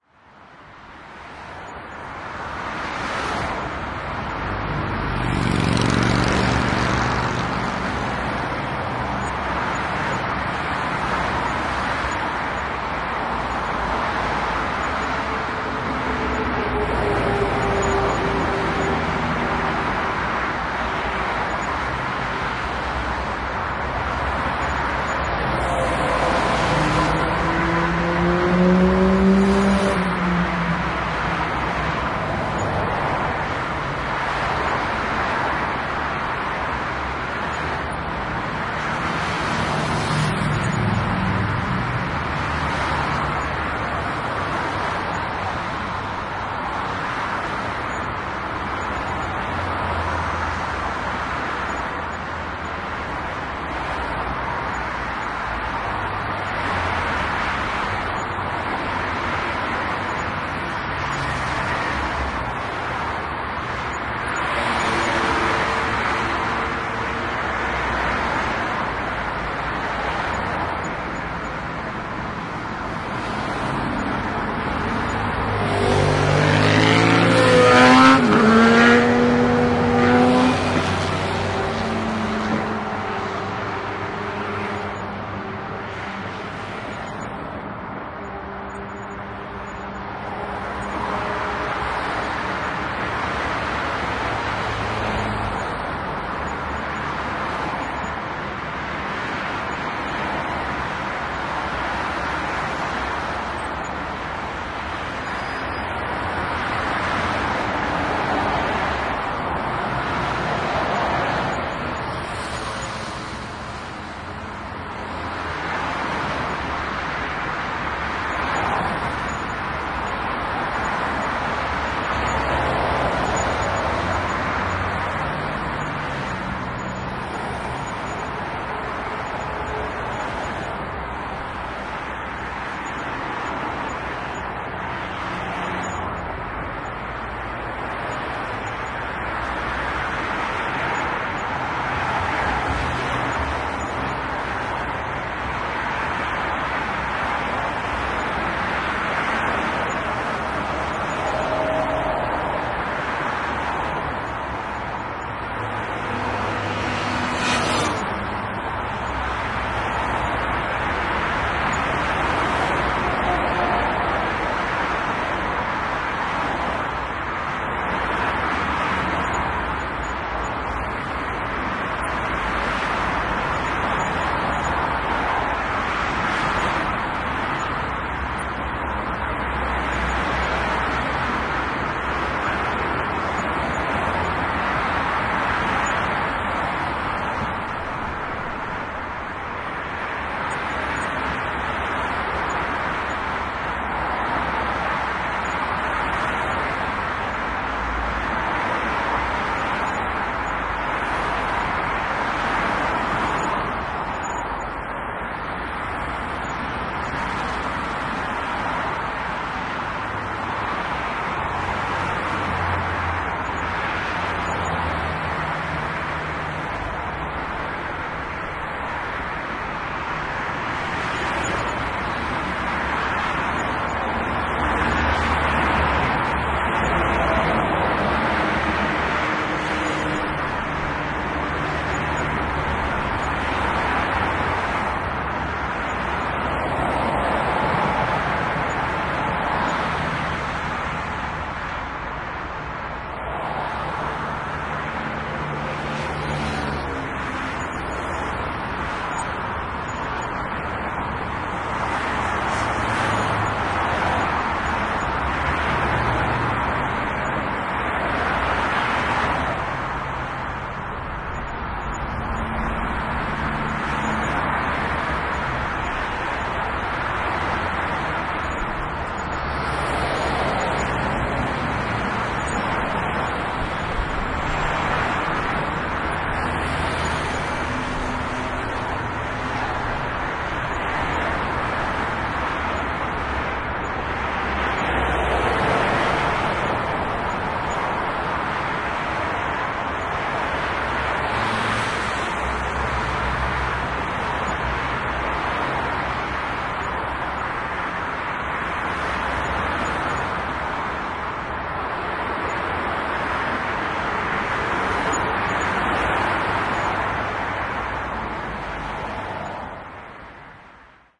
Cars rolling on a highway, recorded from a bridge overlooking the road.